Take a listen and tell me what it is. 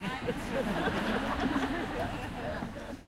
big crowd a little laughter 2
A big crowd of people laughing. Recorded with Sony HI-MD walkman MZ-NH1 minidisc recorder and a pair of binaural microphones.
laughter, outdoor, laugh